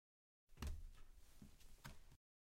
stepping out of shower.